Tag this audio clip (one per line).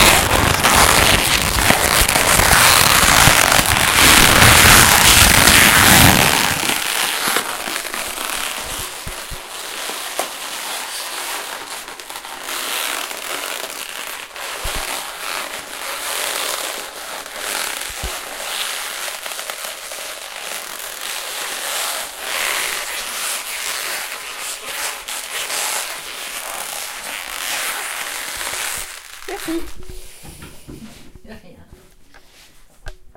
cardboard
creased
horror
strange